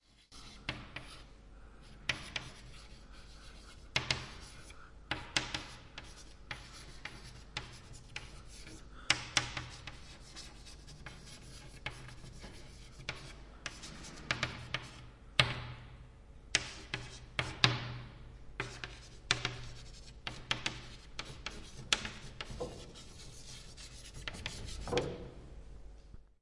wir schreiben mit kreide auf die tafel.
recorded on Zoom H2
we are writing with chalk on a blackboard